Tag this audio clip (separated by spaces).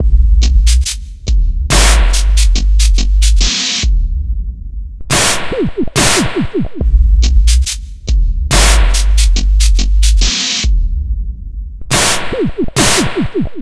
experimental glitch-hop rhythm undanceable monome recordings loop